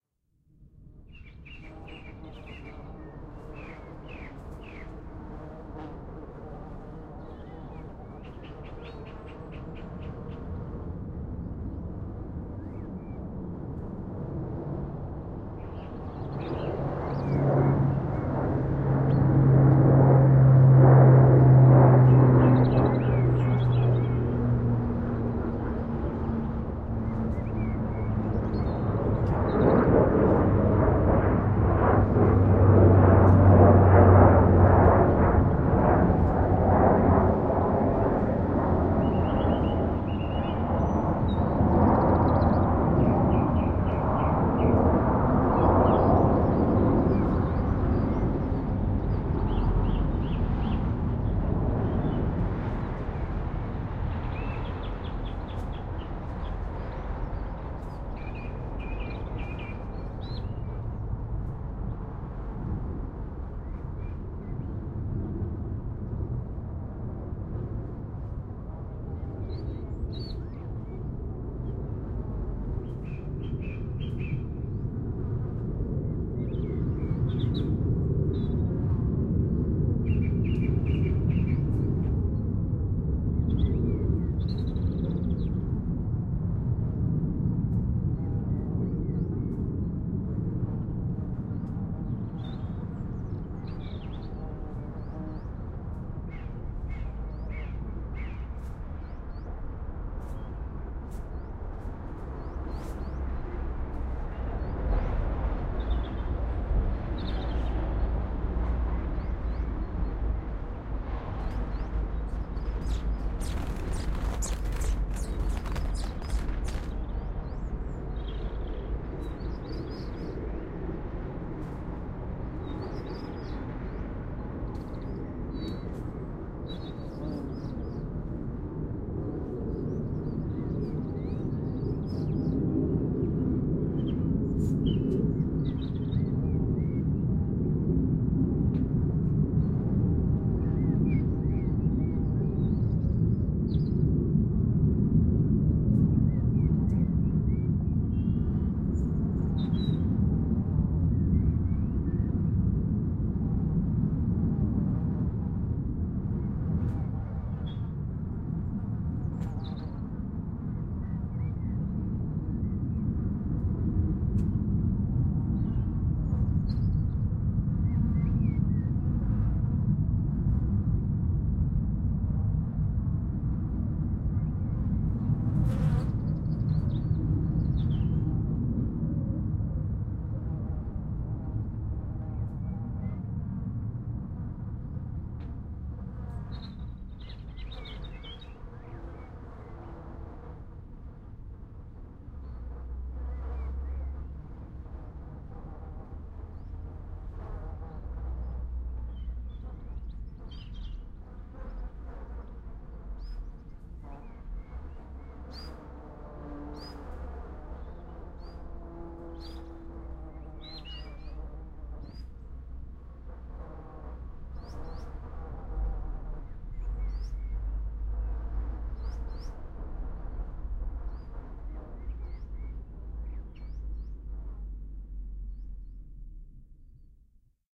Hercules Flyby
A stereo field-recording of a Lockheed C-130 Hercules four engined turboprop military transport aircraft flying low to the left of the mics. Rode NT-4 in blimp > Shure FP 24/Mix Pre > Sony PCM M10
aircraft, field-recording, military, raf, stereo, xy